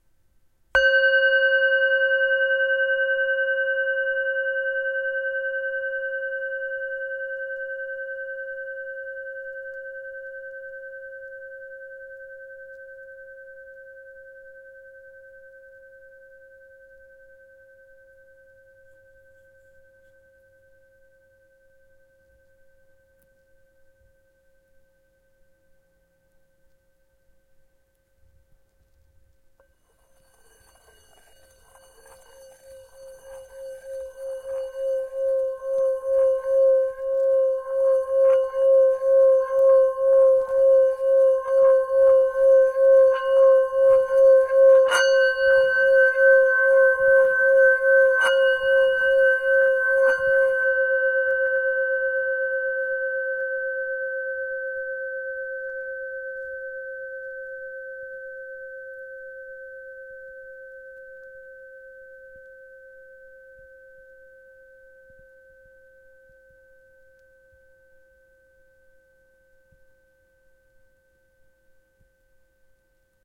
Pentatonic Bowl #5 Sample 2
Pack Contains:
Two 'drones' on a 11 inch diameter etched G2 pitch Himalayan bowl; a shorter drone on the bass and a longer drone with both bass and first overtone. Droning done by myself in my home.
Also contains pitch samples of a 5 bowl pentatonic scale singing bowl set of old 'cup' thado bowls, assembled by myself. Each sample contains both a struck note and a droned note. Some bowls have more than one sample for no particular reason. All performed by myself.
antique, brass, himalayan, meditation, meditative, pentatonic, percussion, relaxation, relaxing, scale, singing-bowl